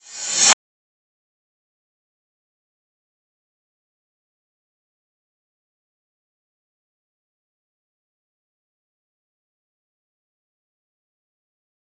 Rev Cymb 10
Reverse Cymbal
Digital Zero
cymbal, reverse